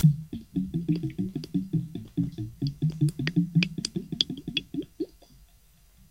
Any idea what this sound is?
After I turn off the water into the bathtub, this is the sound I hear. Recorded with a Cold Gold contact mic attached to the spigot that goes into the tub, into a Zoom H4 recorder.
tub, drain, drip, bath, water, liquid, bathroom, gurgle, glug, contact